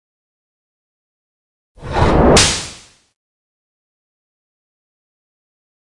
Space Door Open
Combination of foley and synth sounds.